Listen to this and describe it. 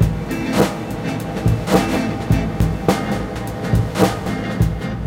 Real-Reggea Dub Loop 2
ragga, reggae